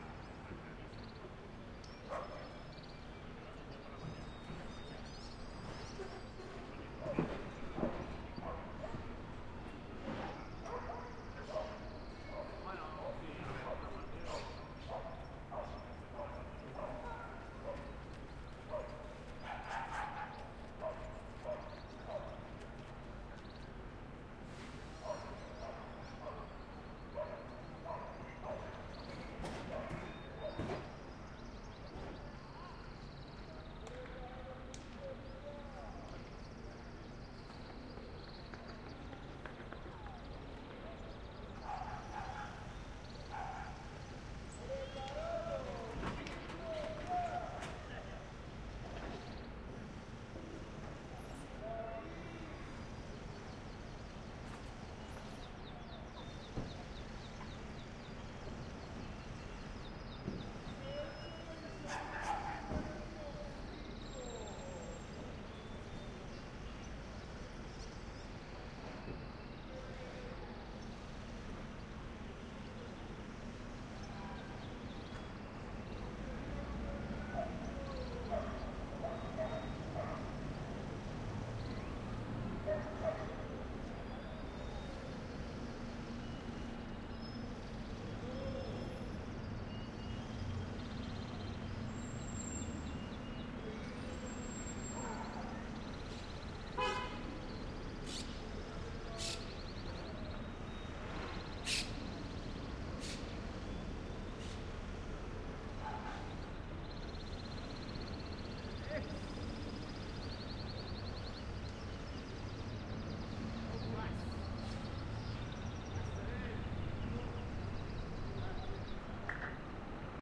Calidoscopi19 Parc Pegaso 3
Urban Ambience Recorded at Parc Pegaso in April 2019 using a Zoom H-6 for Calidoscopi 2019.
Calidoscopi19, Humans, Monotonous, Nature, Pleasant, Quiet, Sagrera, Simple, SoundMap